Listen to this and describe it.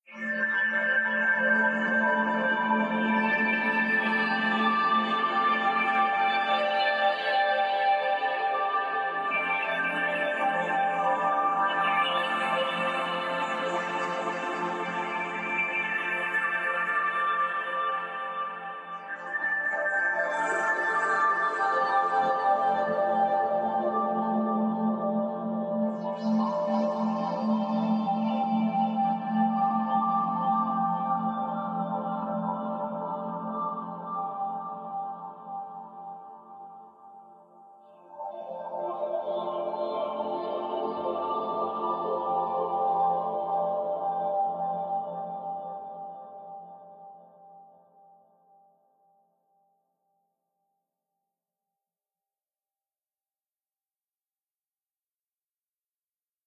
Fmaj-Rain2pad
Pad, created for my album "Life in the Troposphere".